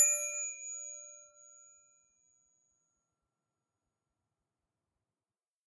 Wrench hit D3
Recorded with DPA 4021.
A chrome wrench/spanner tuned to a D3.
tonal, chrome, percussive, dissonant, metal, ring, Wrench, spanner, high, hit, harmonics